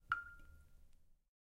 water drop 3
a water drop